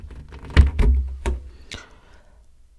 Balloon sequence and breathe
Balloon Sequence and breathing - Zoom H2
balloon, breathe, movement, tongue